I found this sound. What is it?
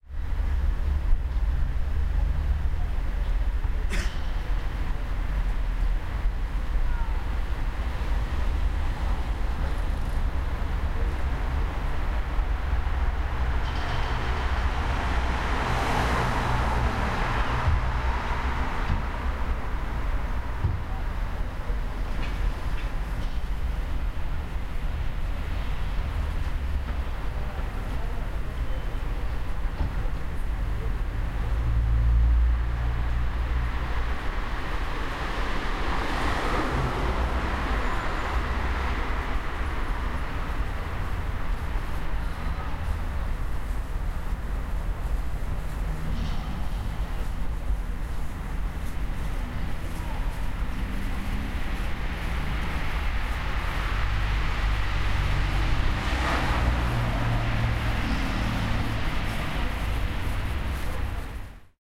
Street Sounds Night
Street Sounds at the evening. Winter.
Passing, Field-Recording, Cars, Traffic, Street, Atmosphere, Night, Sounds